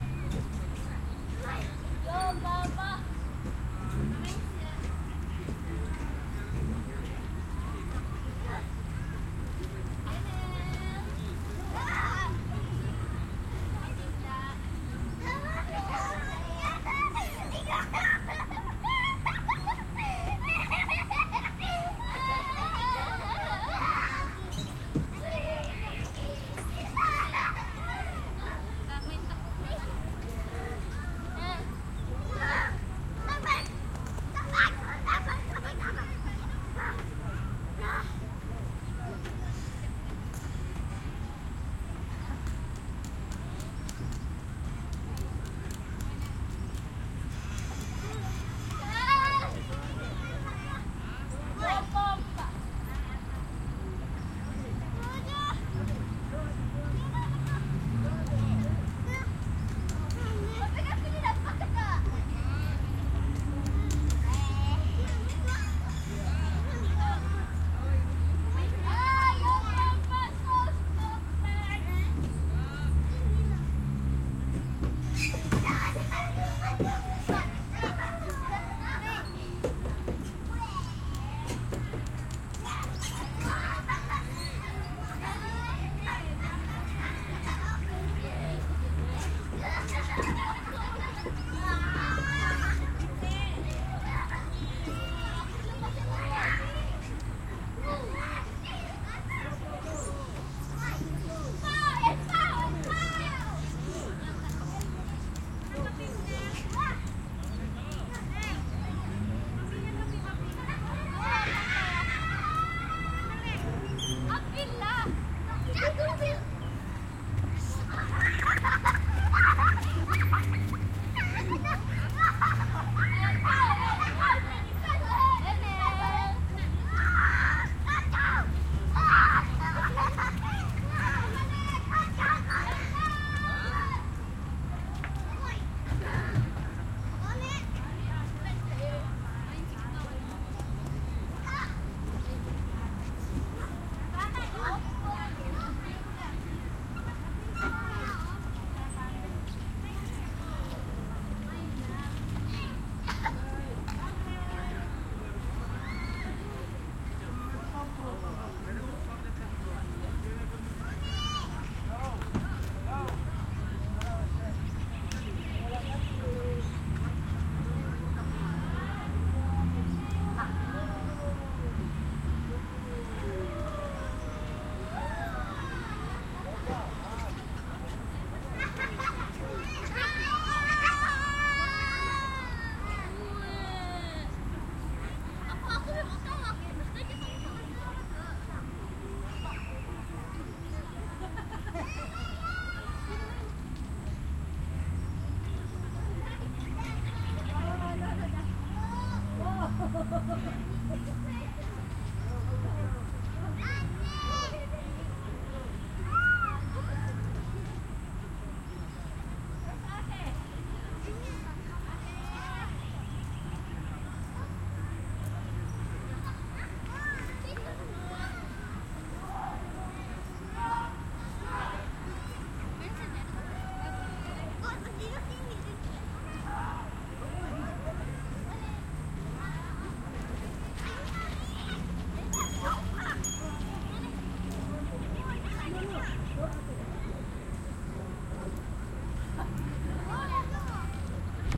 Ambience of a playground in the park
Recorded using Zoom H6 XY configured microphones with 120-degree wideness on both mics with a camera stand holding it.
It was on Monday evening, less wind and not too many people in the park. The park is near to a road, and the languages that you can hear are of Malay, English, and Chinese. This recording aims to record the ambient sound mainly at the playground area, and natural interaction of parents with their children.
kids, field-recording, traffic, playground, parents, playing, youth, outdoor, children, evening, ambient, play, brunei, park